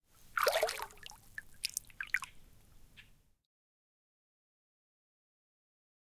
Splasing water in lake
Zoom H4N Pro + accusonus Noise Remover